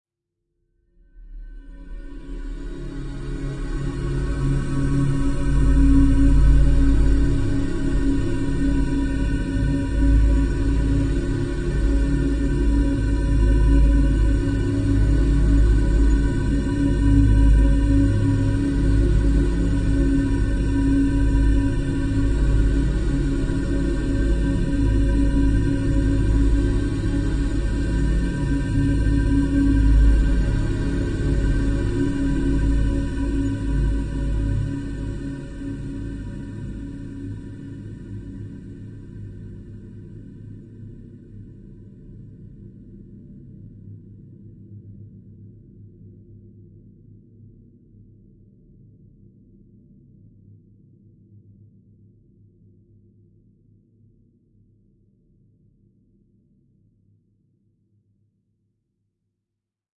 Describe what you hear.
LAYERS 021 - N-Dimensional Parallel Space-26
LAYERS 021 - N-Dimensional Parallel Space is an extensive multisample packages where all the keys of the keyboard were sampled totalling 128 samples. Also normalisation was applied to each sample. I layered the following: a pad from NI Absynth, a high frequency resonance from NI FM8, a soundscape from NI Kontakt and a synth from Camel Alchemy. All sounds were self created and convoluted in several way (separately and mixed down). The result is a cinematic soundscape from out space. Very suitable for soundtracks or installations.
divine, cinematic, pad, soundscape, space, multisample